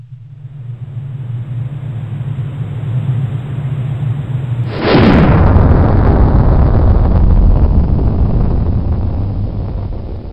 Explosion sound created at work with only the windows sound recorder and a virtual avalanche creation Java applet by overlapping and applying rudimentary effects. This is shock wave approaching at high speed.